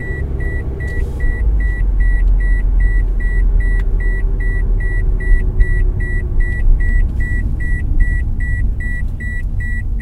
In the car with my seat-belt un-fastened while driving.